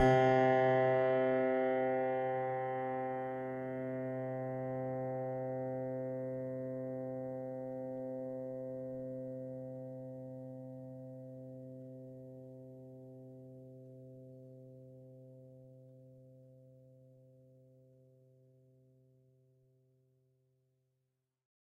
a multisample pack of piano strings played with a finger
multi, piano